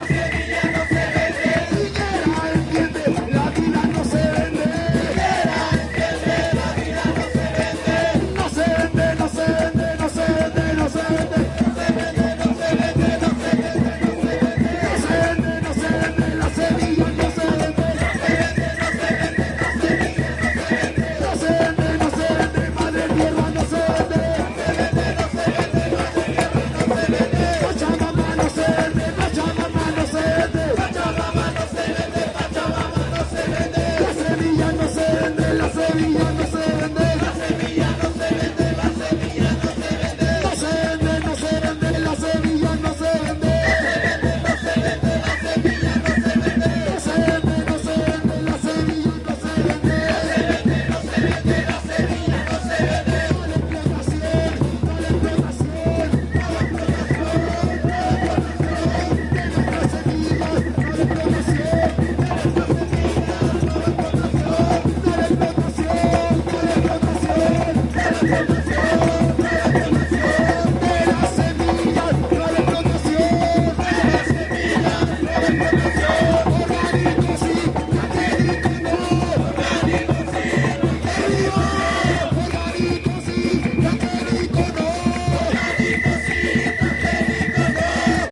Varios cánticos en contra del gigante de las semillas transgénicas. Conversaciones, intrumentos de percusión,
aplausos, silbatos.
piñera entiende, la vida no se vende
pachamama no se vende
la semilla no se vende
no a la explotacion